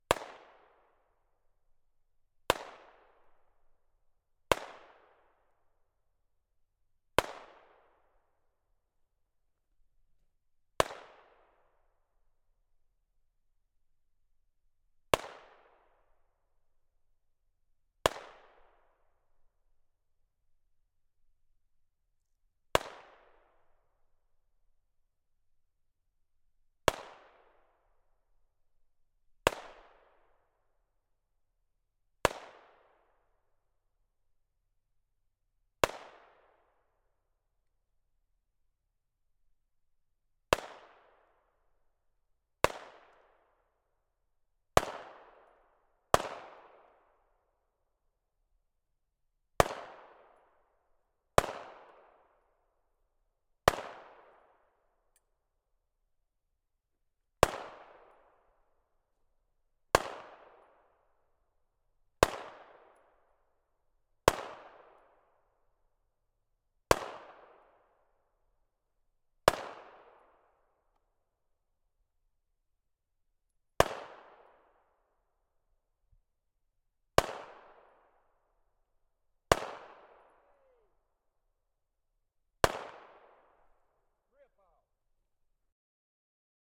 Gun Shots - Pistols
I happened to hear from indoors some of my family members shooting guns outside. So I followed inspiration, wired a Kam i2 into my Zoom H4N, and recording them shooting a target. It sounds pretty good, though it's in mono. The guns were a .22 Beretta (the smaller sounding one) and a 9mm Cobra (the louder one towards the end).
gun, firearm, shot, cobra, outdoors, beretta, pistol, 22, shooting, 9mm